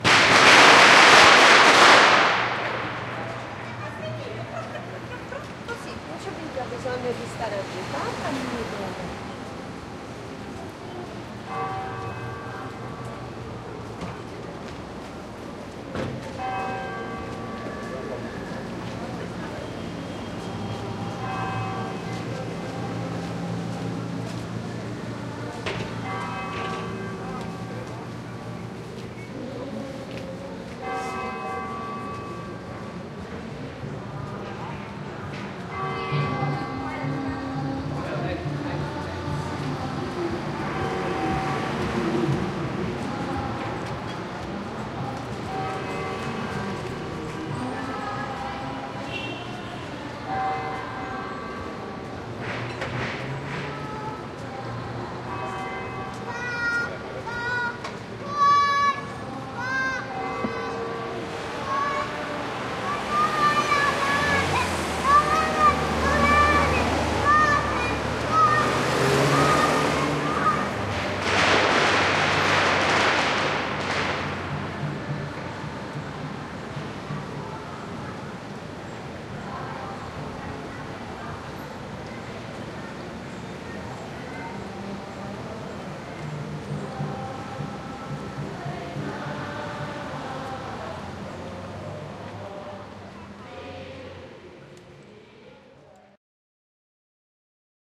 February 14, 2010 Chinese New Years Day in San Francisco. The year of the Tiger! (SF Ca. USA.)
field-recording, fire-crackers, purist, chinese, new-year